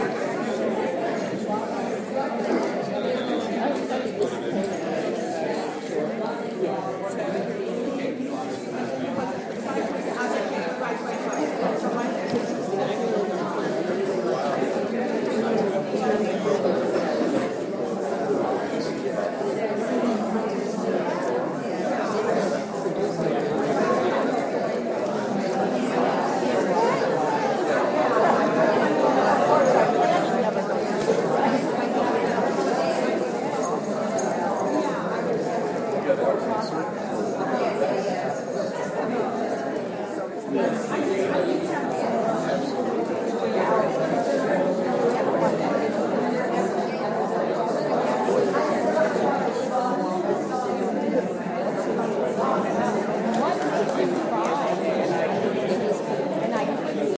A very noisy crowd of people in a small room